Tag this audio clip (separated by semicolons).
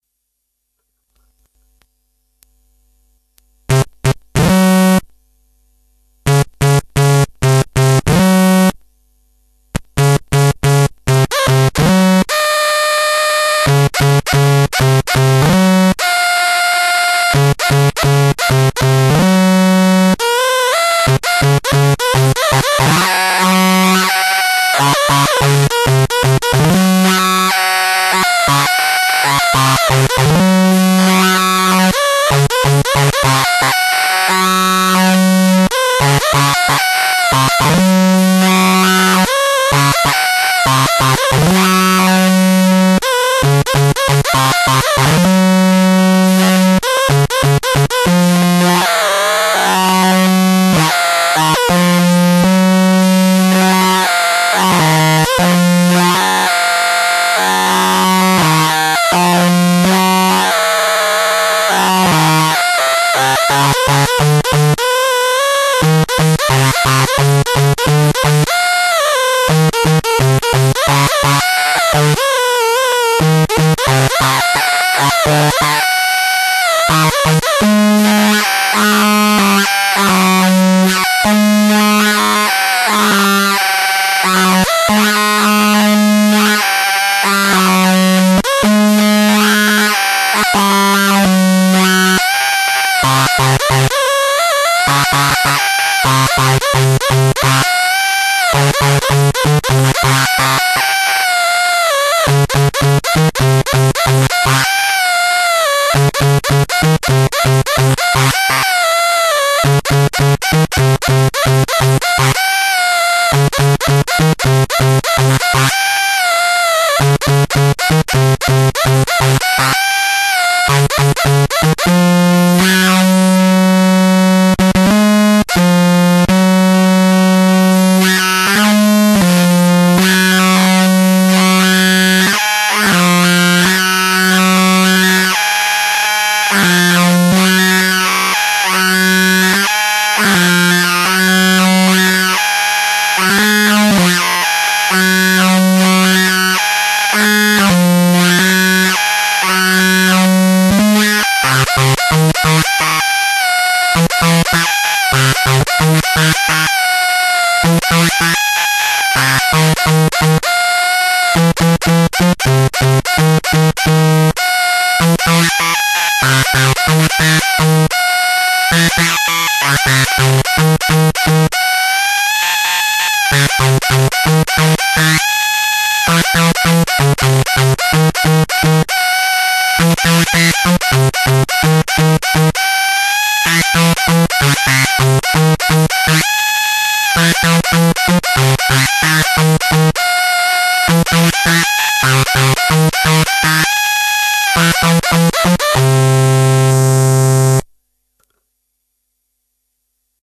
riff; lead; circuit; loop; stylophone; bent; synth; glitchy; bending; phrase; feedback; hard; line; 130bpm; twisted; nasty; distortion; dry